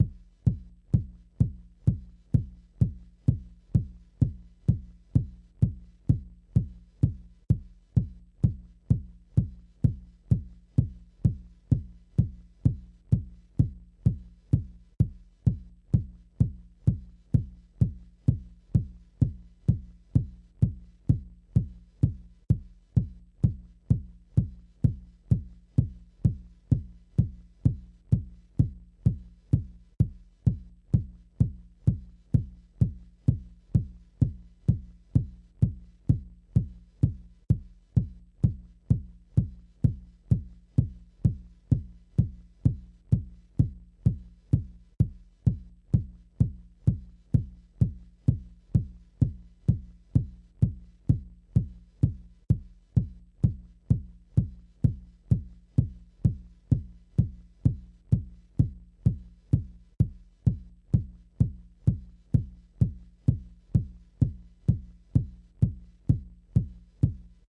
Arturia Acid Kick
128, acid, analog, arturia, bass, beat, bit, club, dance, drum, drumbrute, drum-loop, drums, edm, electro, electronic, Fm, glitch, house, kick, loop, minibrute, minimal, quantized, rave, synth, techno, trance